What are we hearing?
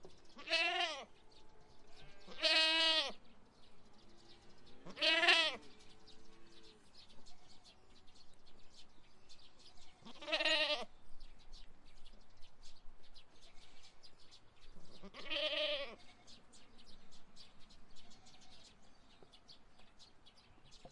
Gout, Korea, Sound